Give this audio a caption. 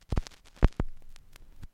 The sound of a stylus hitting the surface of a record, and then fitting into the groove.